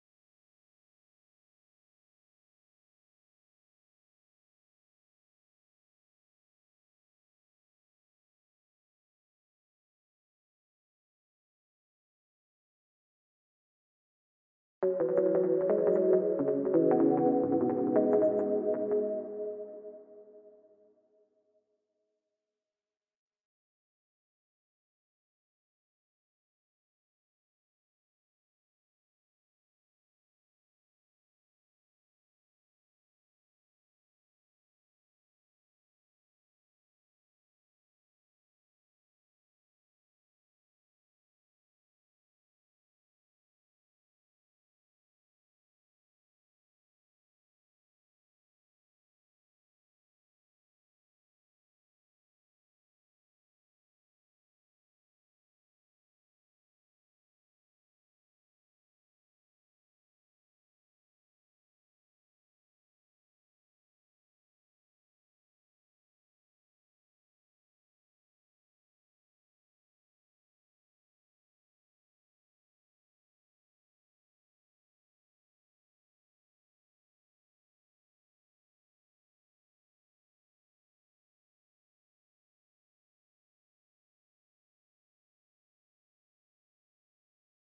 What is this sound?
at peace with the ambience
ambient, relaxing